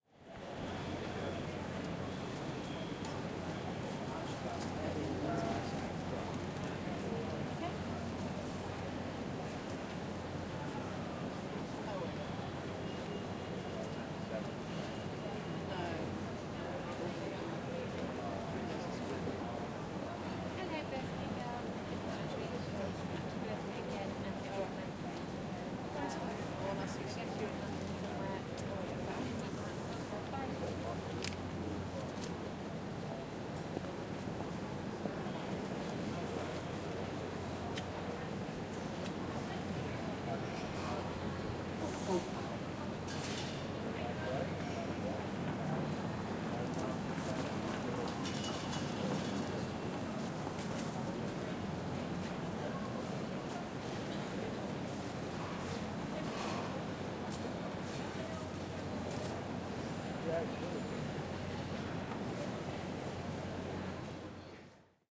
Recording of the main concourse.
Equipment used: Zoom H4 internal mic
Location: King's Cross Station
Date: July 2015